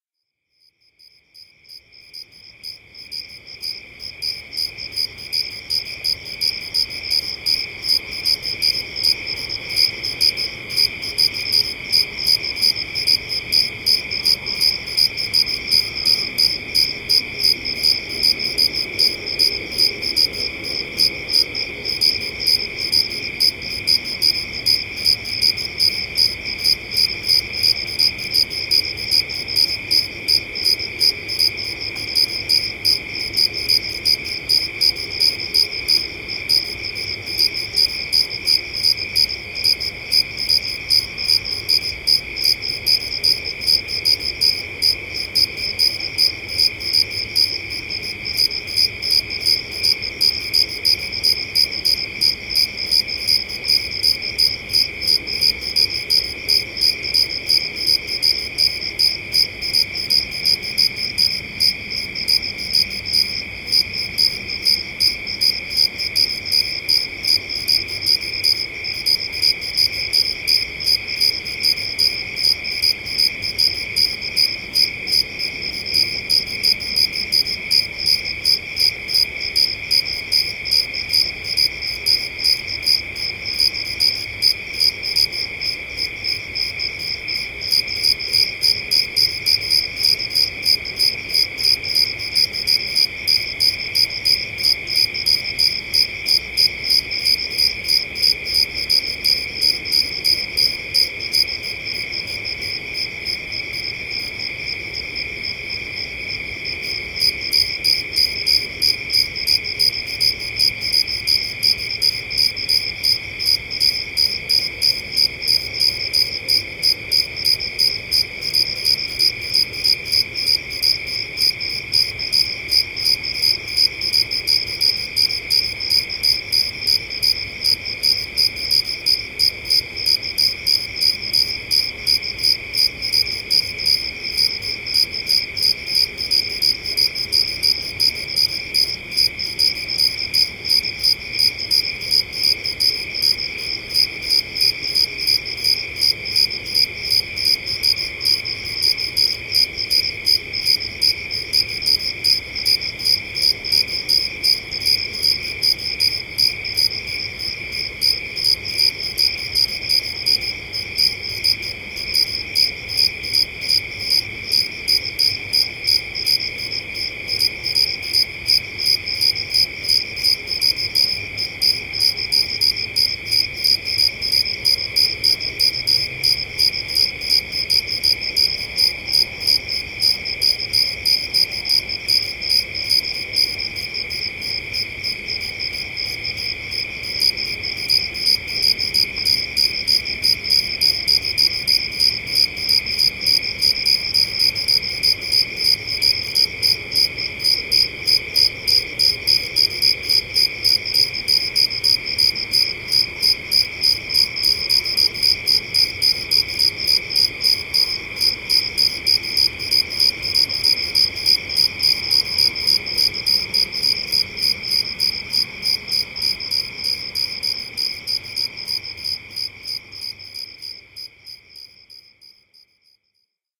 sherman 29aug2009tr15
ambient, california, crickets, sherman-island